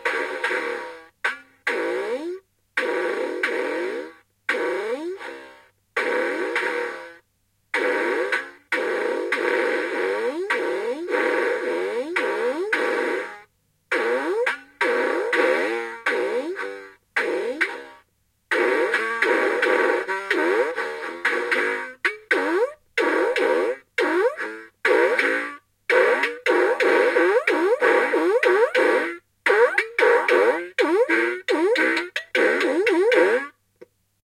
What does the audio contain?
broken toy
a recording using the edirol r-09 of my little boy's musical toy running on very low batteries! some great noises...
toy, broken, childs